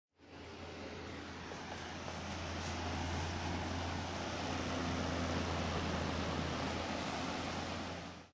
Sonido realizado para el final de la materia Audio 1, creado con foley, editado con reaper y grabado con Lg Magna c90
Audio1, HouseSounds, Reaper